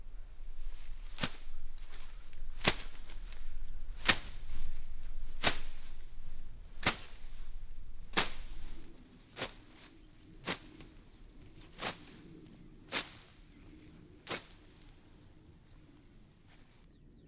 A field recording of someone sickling wheat field. Little background noise at the end. Recorded with a microphone neumann KMR81 through SQN4S mixer on a Fostex PD4